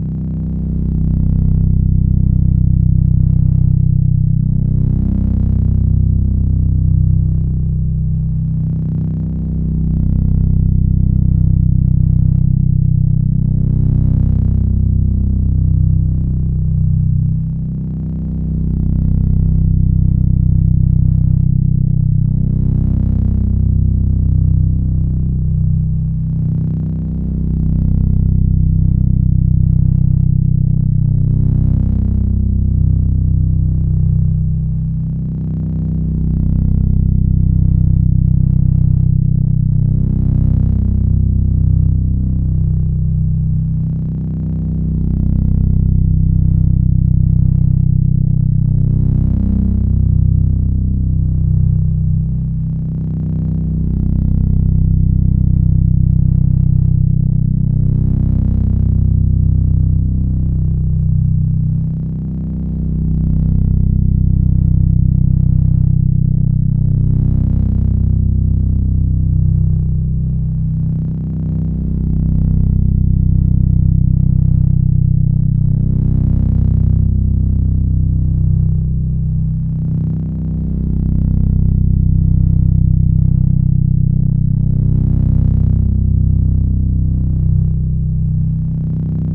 Created using an A-100 analogue modular synthesizer.
Recorded and edited in Cubase 6.5.
It's always nice to hear what projects you use these sounds for.